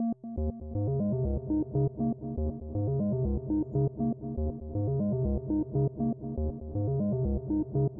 a low pass filtered bleepy loop to complement the learner strings loop sample. Sounds like tommorrows world infotainment . under the sea ?
303, acid, bleep, chilled, loop, mild, science, synth, tb303